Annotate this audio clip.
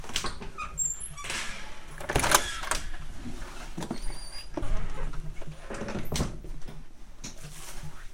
door open
field-recording, foley, sample